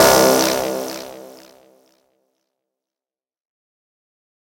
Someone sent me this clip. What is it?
Blip Trails: C2 note, random blip sounds with fast attacks and short trails using Massive. Sampled into Ableton with just a touch of reverb to help the trail smooth out, compression using PSP Compressor2 and PSP Warmer. Random parameters in Massive, and very little other effects used. Crazy sounds is what I do.
110, porn-core, synthesizer, dub-step, hardcore, techno, electronic, lead, synth, dance, dark, blip, glitch, sci-fi, processed, acid, random, rave, resonance, noise, house, bpm, club, glitch-hop, trance, electro, sound, effect, bounce